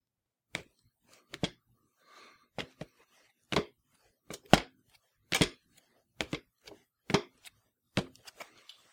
Footsteps-Step Ladder-Metal-03
This is the sound of someone stepping/walk in place on a metal step ladder. It has a sort of flimsy metal walkway sound too it.
ladder, Run, Walk, Footstep, walkway, Step, metal, step-ladder